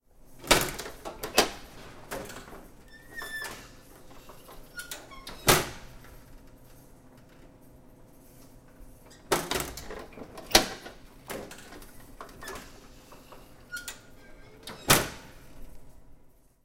photocopier door
sound produced when opening the tray of the photocopier, where is situated the paper to be copied.